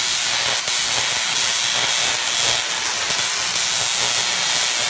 I was super inspired to post here again after an insane coincidence happened!
I was in an online class (thanks, covid) where we were supposed to redo all the sounds and music for an animation we chose. In one classmate's animation, a sound he used seemed VERY familiar, and then it hit me.. It's my sound! From this site! The crazy part is he has my same first name.
But anyway, thank you for taking a look and listen to this sound which is about 2 years old now, heh. I went to this exhibit about electronic and/or audio stuff. In the show they were doing on stage, they brought various tesla coils and things I forgot the name of. I decided to take a video. Within that video, is this sound! ;D
The only processing I did was cutting out any talking during the raw recording.
(As long as you don't blatantly steal credit, of course.)